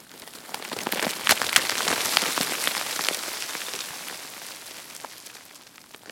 Small stone avalanche caused by dislodging some rocks from an overcrop.
Recorded with a Zoom H2 with 90° dispersion.